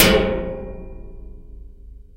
conduit at angle2
Large square metal road sign struck at various places along the edge at a 45 degree angle with a 2' piece of metal electrical conduit. Mostly low frequencies from the sign, some high frequencies from the metal conduit. The sort of sound you might hear in "Stomp".
ping, sheet, metallic, stomp, metal, percussion